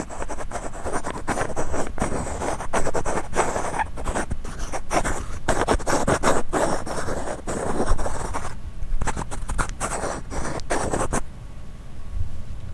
Small pieces of metal being ground against each other
Swooshing, Grinding